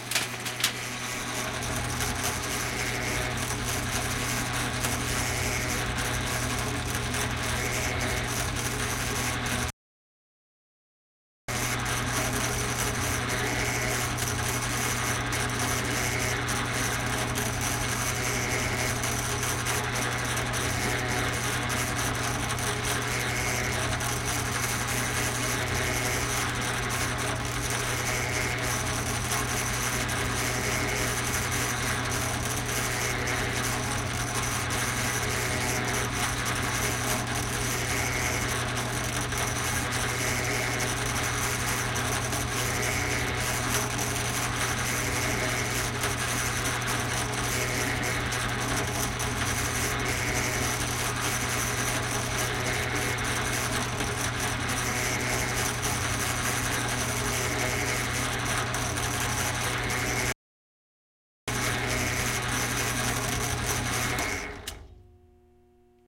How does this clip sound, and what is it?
machine metal vibrating grinding drilling hole maybe
vibrating, grinding, hole, drilling, machine, metal